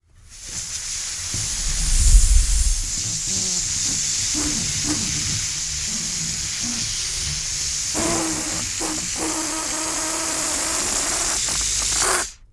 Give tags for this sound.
Balloon
Gas
Pressure
Air

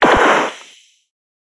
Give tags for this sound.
audio
laser
fire
clip
weapon
handgun
noise
shot
pistol
gun

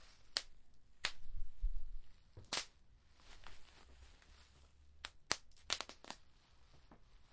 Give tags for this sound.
popping
wrap
bubbles
plastic-wrap
dare-9
bubblewrap
pop

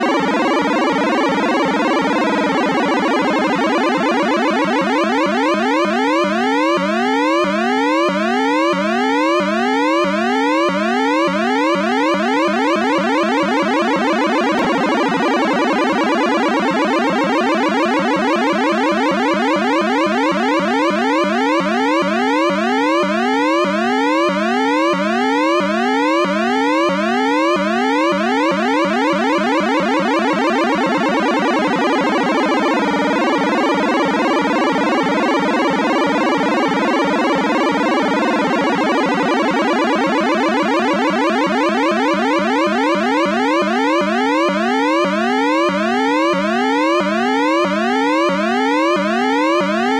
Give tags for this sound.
8-bit
arcade
cartoon
chip
chiptune
gameboy
lo-fi
retro
siren
video-game

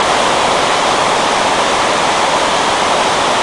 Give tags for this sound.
lead
noise
hardstyle
harsh
evil
synth

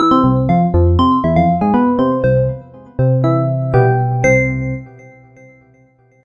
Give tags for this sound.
application
bleep
blip
bootup
click
clicks
desktop
effect
event
game
intro
intros
sfx
sound
startup

